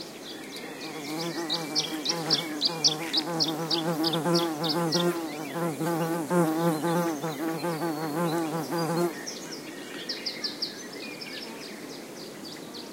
buzzing bee, with bird tweets in background. PCM M10 internal mics
ambiance, bee, birds, buzzing, field-recording, insect, nature, spring